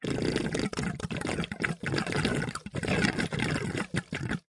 various sounds made using a short hose and a plastic box full of h2o.